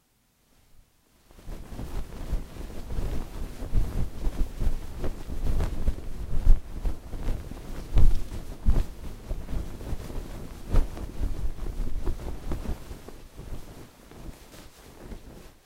Slow Blanket Shaking
fluff, bed, fabric, pillow, fluffing, blanket, shaking, shake